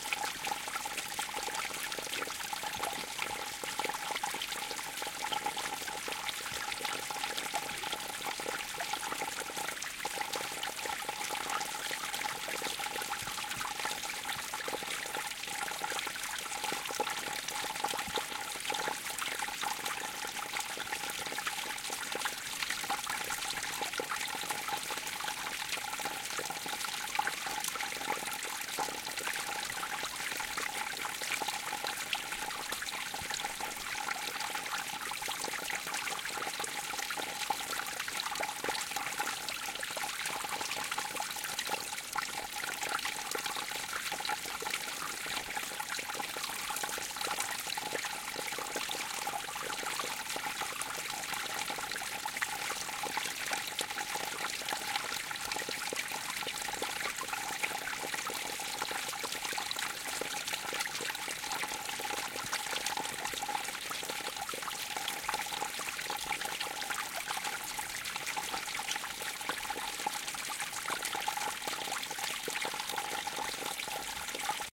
coldwater stream 03
A small stream flowing into Coldwater Lake near Mt. Saint Helens. Recorded with AT4021 mics into a modified Marantz PMD661.